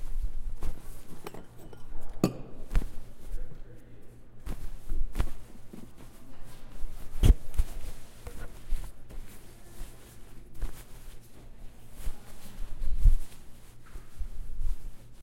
Jacket Rustle Aggressive 2
Jacket rustle 2. More aggressive
aggressive, hard, Jacket, rustle